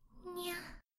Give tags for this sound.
Adorable; Anime; AnimeGirl; AnimeVoice; Cat; Catgirl; Cute; CuteGirl; CuteVoice; Girl; GirlVoice; Kawaii; Meow; Meowing; Neko; Nya; SoundEffect; VA; Voice; VoiceActor; VoiceActress; VoiceOver; Waifu

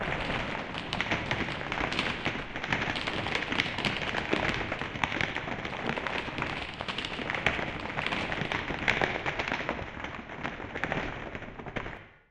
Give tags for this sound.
dirt; falling; pebbles; rocks; rockslide; rubble; stone

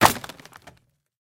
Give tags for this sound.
break; crate; wood

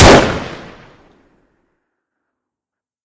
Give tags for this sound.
War; Gunshot; Rifle

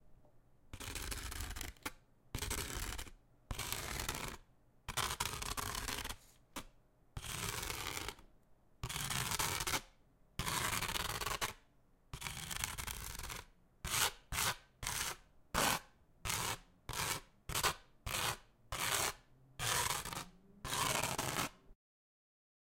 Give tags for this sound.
hard; owi; scratching

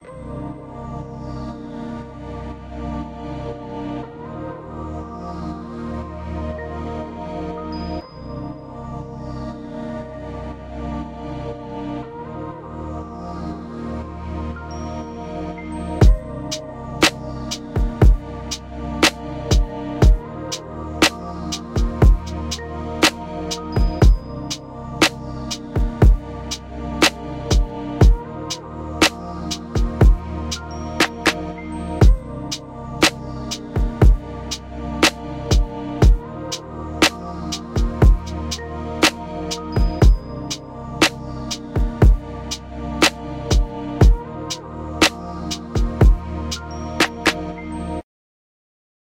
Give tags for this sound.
hop; hip; looppacks; loop; synth; pan; vocals; drums; melody; beat; hiphop; fuzz; pad; garageband; dance

